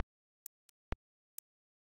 microkit extremely short nifty